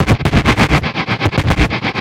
ground loop 2
created by shorting 1/4' jack thru a gtr amp